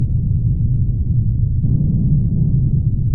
just some nice and pink noise used for a fireball. Created with the Bristol Moog Mini emulation